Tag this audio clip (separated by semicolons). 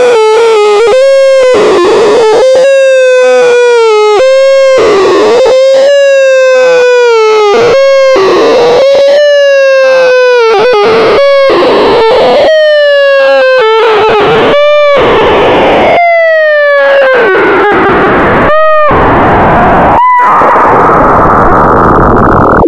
chuck; sine; sci-fi; programming; chaos